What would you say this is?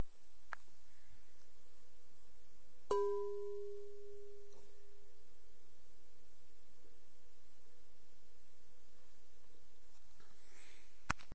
Striking glass bowl with the tip of the finger.
striking, bowl, finger, glass